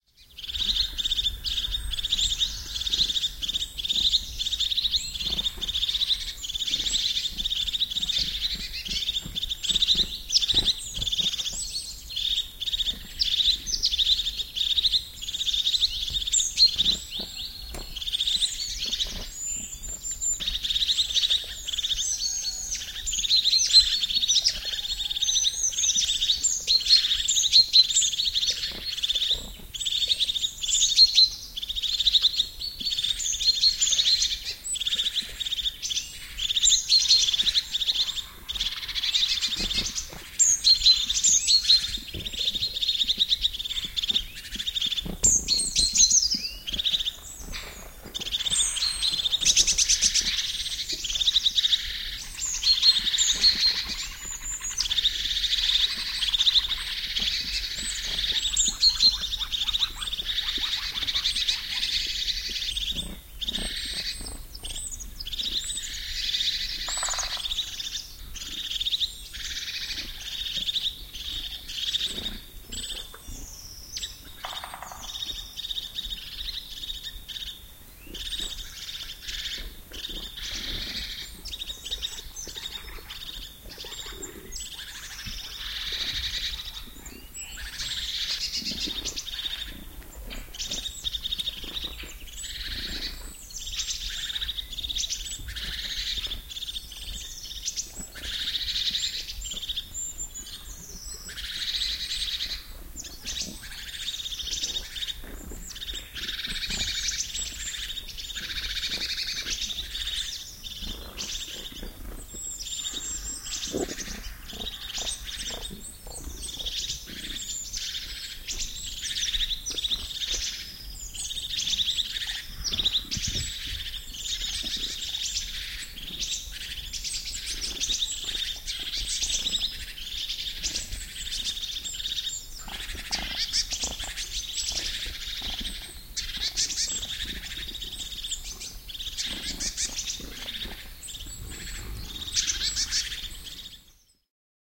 Sinitiainen, parvi, kevät / A flock of blue tits chirping loudly in the spring, wings flapping, flutters
Sinitiaiset ääntelevät vilkkaasti, pyrähdyksiä, siipien ääniä.
Paikka/Place: Suomi / Finland / Siuntio, Kahvimaa
Aika/Date: 15.05.2000
Birds, Nature, Tehosteet, Chirp